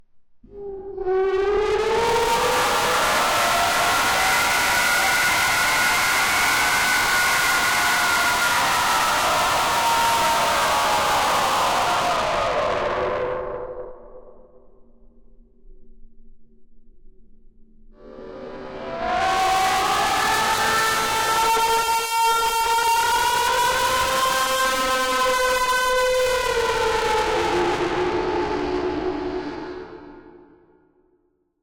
Tortured distorted scream
Just your typical scream of pure evil from the depths of Hell. Mix it in far into the background of a scary movie to give the audience a subtle feeling of unease, or at full volume for a truly horrific effect.
fear, disturbing, horror, spooky, hell, sinister, nightmare, evil